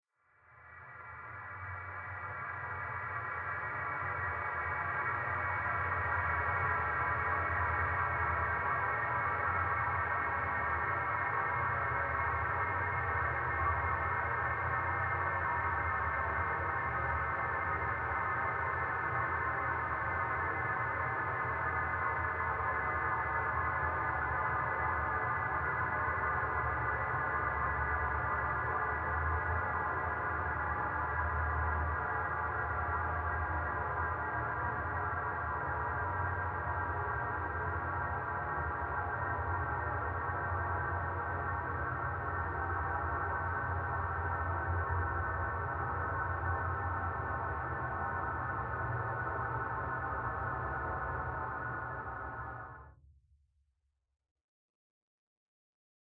bnrl lmnln rain out FB Lgc
I used only the EVOC filterbank (vocoder) from Logic Pro to filter the sound file in a study of noise-filtering.